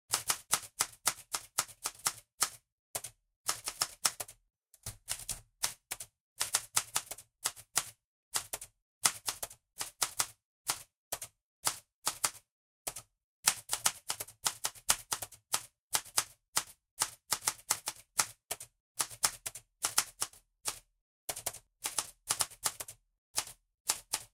Typewriter snippet 01 stereo [loop]
Looking for mono?
Processed recording of a portable mechanical typewriter.
Captured using a Clippy EM172 microphone and a Zoom H5 recorder.
Edited and processed in ocenaudio.
It's always nice to hear what projects you use these sounds for.
One more thing. Maybe check out my links, perhaps you'll find something you like. :o)
loop, letter, vintage, typewriter, typing, recording, office, writing, keyboard, Schreibmaschine, key, impulse, dry, write, mechanical, keystroke, old, type, writer